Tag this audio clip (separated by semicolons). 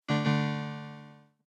PSR36; Off; Error; On; Long; Low; Muffled; Sound; Bell; Percussive; Yamaha; Vintage; Reward; High; Keyboard; Synth; Notification; Synthethizer; Alert; Digital; Minimal; Short; Design